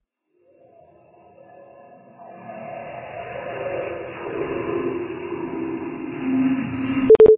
A UFO like sound generated in Audition.